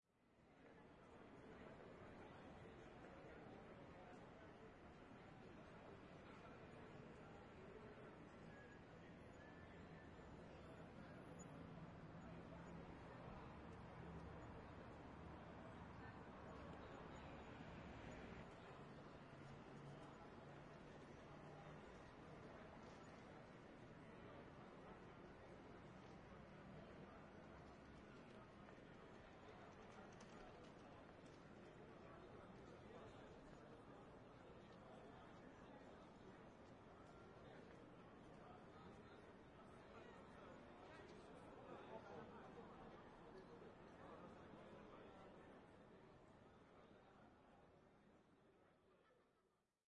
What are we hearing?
Amsterdam Atmos - Museumplein - Crowd, Cobra cafe, 50 businessmen chatter on outside terras + more heard inside trough open doors @ 40 mtr
About 50 businessmen chatter after visiting a convention, very lively, international, English, German and other languages heard. Faint traffic sounds heard in bg. This one recorded @ 40 mtr. See other recordings for different perspectives.
ambience, atmosphere, chat, crowd, male, people